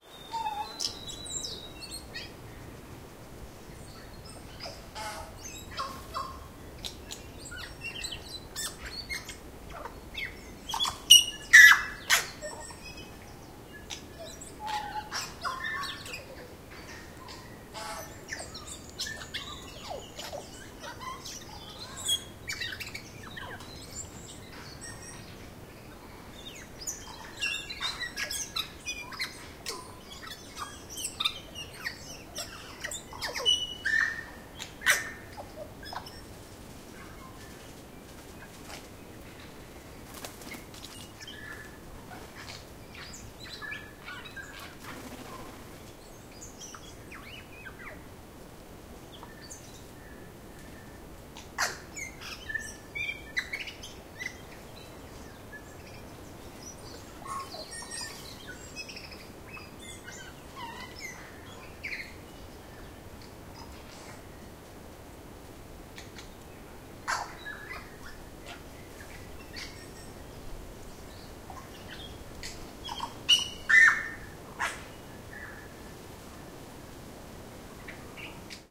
Tui birds singing close distant bush NewZealand
New Zealand Tui birds singing in the bush. One Tui is singing close by. It jumps to different branches several times, characteristically flapping its wings. Other birds, mainly Tuis, continuously singing in the distance. Slight wind in the background.
Recorded at Goldies Bush, New Zealand, in October on a sunny day.